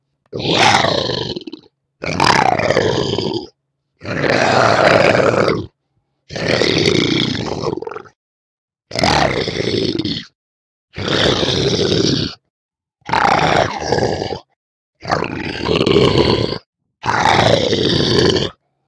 monster-snarl-5
Yet more monster snarls!
animal, beast, creature, growl, hound, monster, snarl